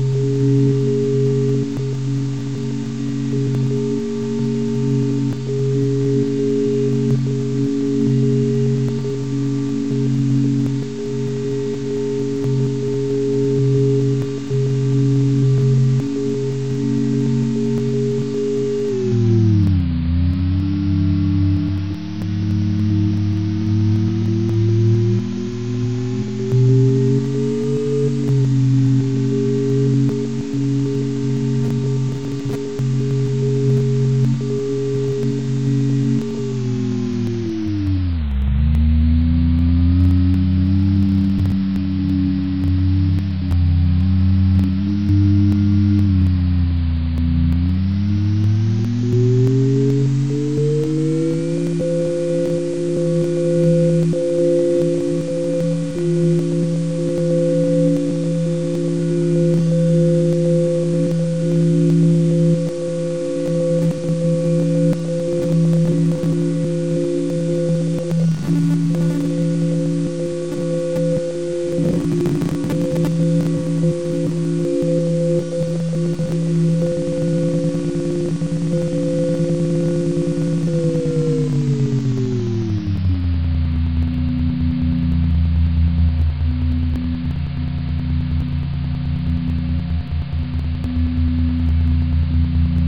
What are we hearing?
spaceship engine2
Unusual drone with artifacts created by altering header of non-audio file and processing.